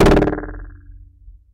Hits from a contact mic instrument with 2 rubber bands and 2 springs.